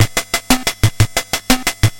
"12 beat" drum pattern from Yamaha PSS-170 keyboard
pss170, 80s, portasound, yamaha